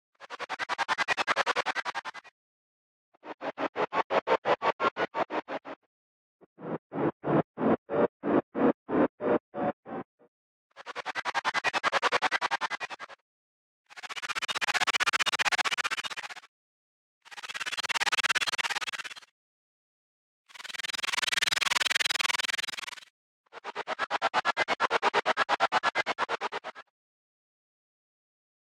Transformation Morphing
Could be use in a transformation or morph.